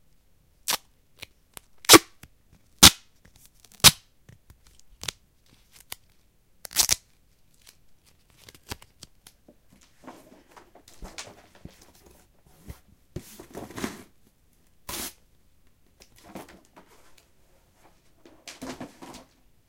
Tape1 duct home Jan2012
In this sound I am unrolling a piece of duct tape, tearing it and placing then replacing it on a cardboard box. Recorded with a zoomH2.
cardboard-box, dare-9, duct-tape, field-recording, packaging, shipping, sticky, tape